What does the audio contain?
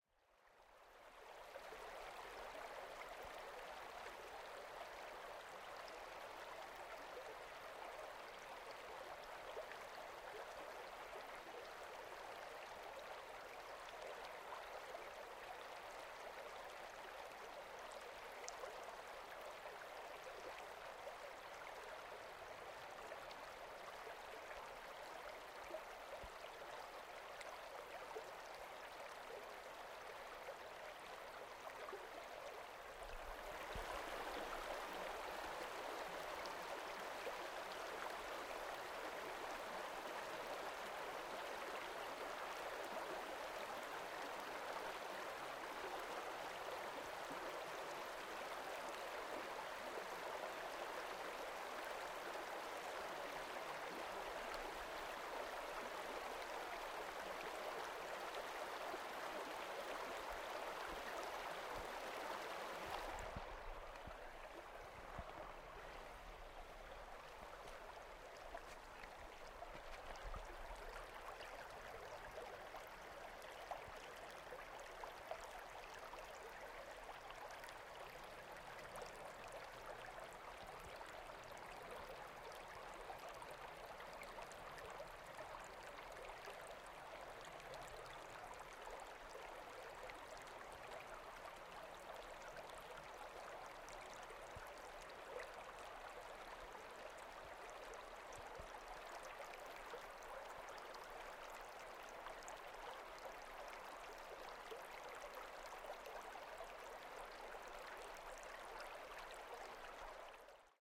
Mountain stream ambiance in the Italian Alpes near Montblanc Pico Bianco
Recorded with Rode Videomicro and Rode iphone app
Alps, creek, field-recording, foley, glacier, gletscher, Italy, melting, melt-water, mont-blanc, mountain, pico-bianco, river, stream, water